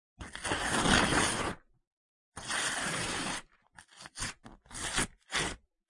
Bedroom Ripping Paper Close Persp
A bedroom sound effect. Part of my '101 Sound FX Collection'